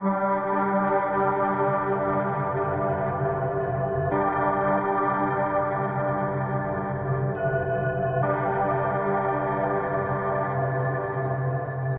10 ca pads
ambience, atmos, atmosphere, atmospheric, background-sound, horror, intro, music, score, soundscape, suspense, white-noise